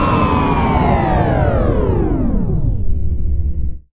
Cutting Power
To make the effect, I cut out the one part then fade out. Sounds like that one sound when the power has been cut in FHFIF Remix.
243170, cut, down, outage, power, powercut, power-outage, shut, shut-down